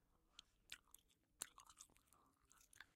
chewing gum
4maudio17, candy, chewing, gum, mouth, uam